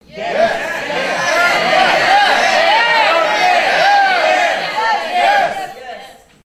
Audience Yes
Recorded with Sony HXR-MC50U Camcorder with an audience of about 40.